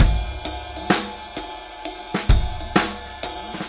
trip hop acoustic drum loop